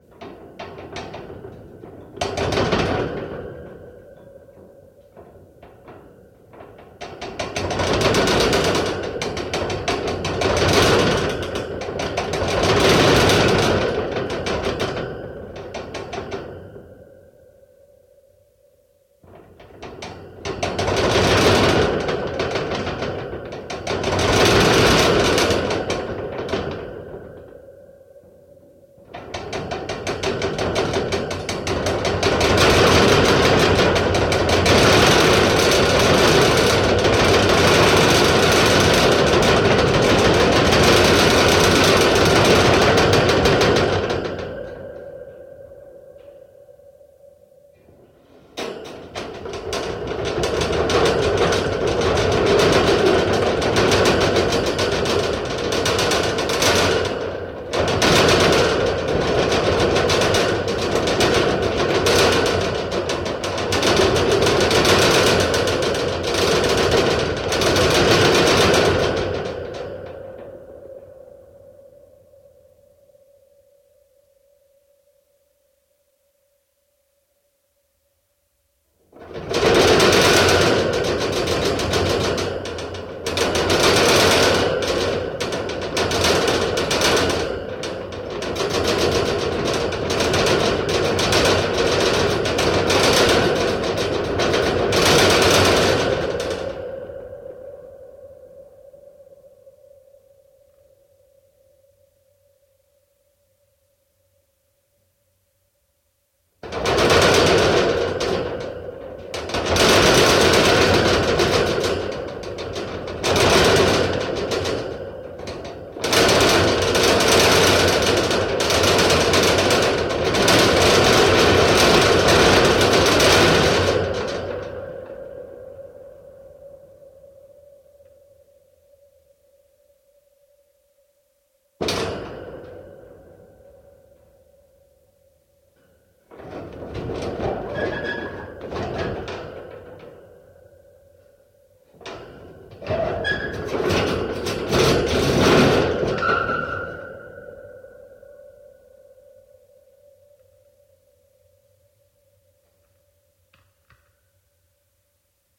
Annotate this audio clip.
contact metal cage shaking reverb long mono
This sound effect was recorded with high quality sound equipment and comes from a sound library called Metal Contact which is pack of 136 high quality audio files with a total length of 230 minutes. In this library you'll find different metal sound effects recorded with contact microphone.
metal, impact, mechanical, tool, tools, gear, metallic, shake, microphone, bars, shaking, clank, reverb, contact, bar, cage